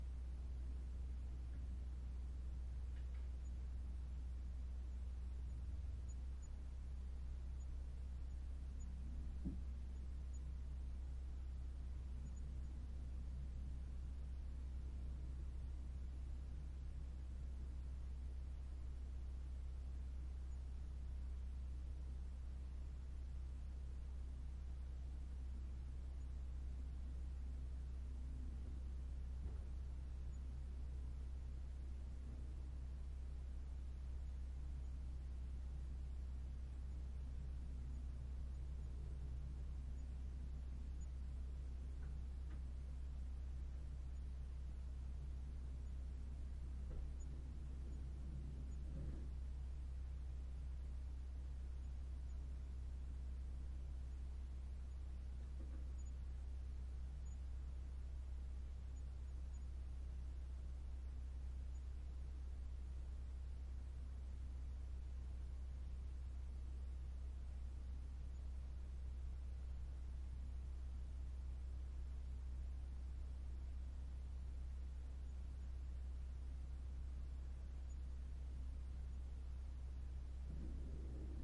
Home Ambience
Ambience recorded in one of the rooms upstairs with a Zoom H1.